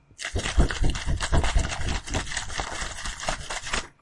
shaking jug of water
I'm just shaking a jug of water
jug, shake, shaking, water